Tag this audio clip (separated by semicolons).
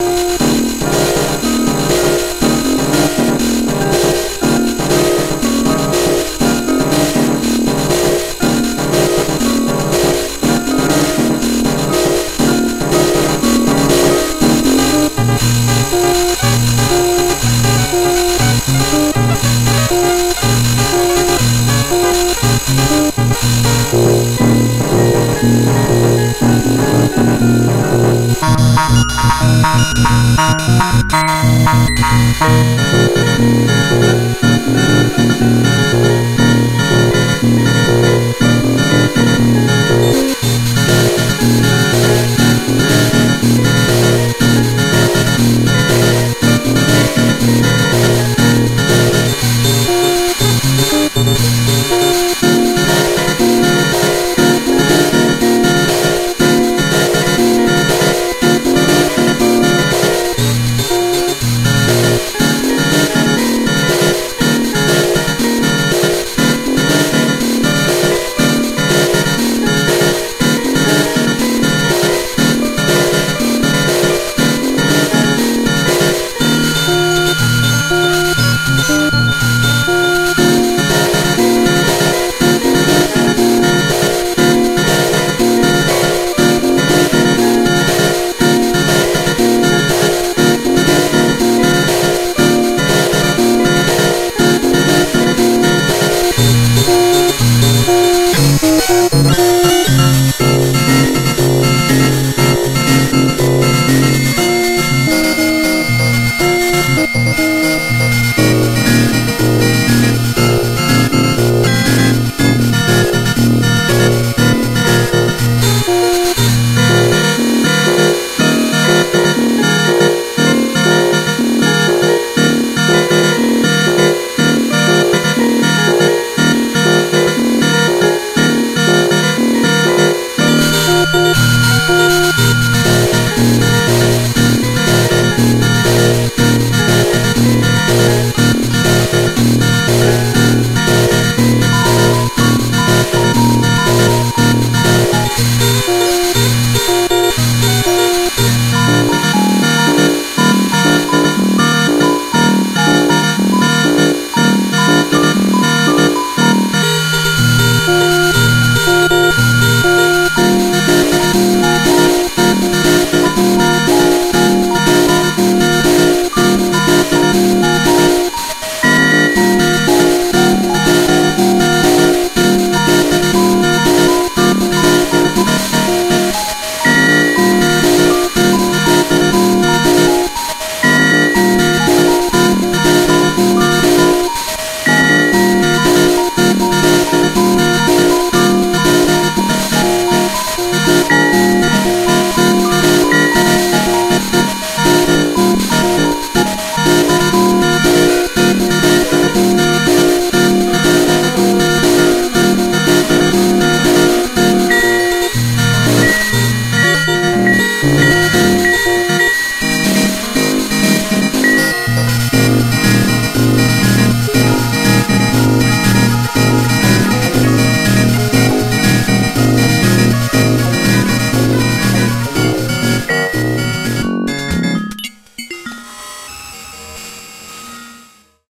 bent; circuit; keyboard; yamaha-psr-12